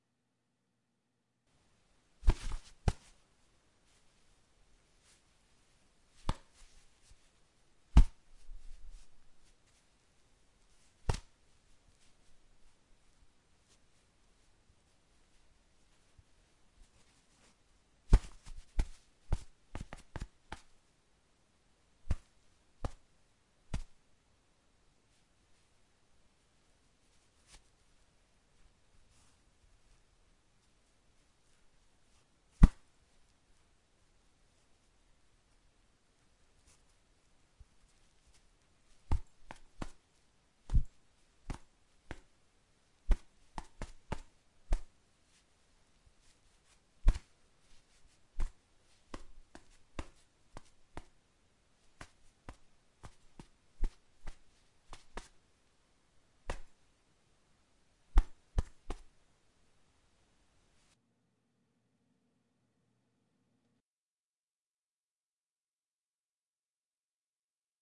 Puppet Kung-Fu, fight sounds, percussive impacts and hits